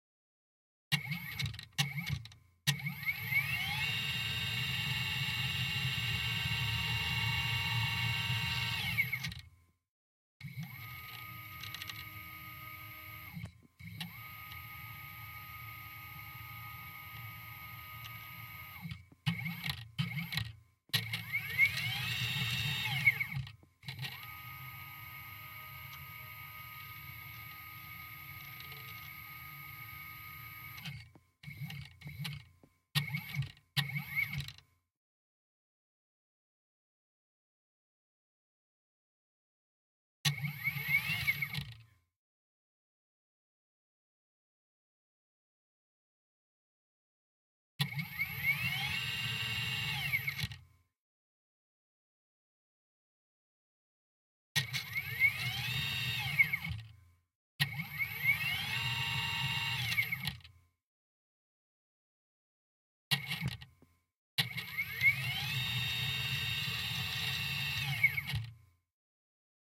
motor servo 3d printer 3d cutter industrial robot machine mechanical robotic factory
Recording of various movements with contact mic on the cutting head.